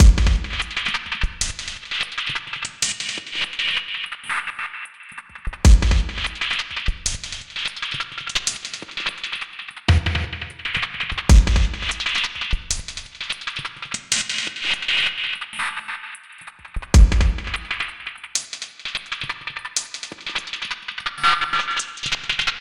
Glitch Drum loop 7a - 8 bars 85 bpm
Loop without tail so you can loop it and cut as much as you want.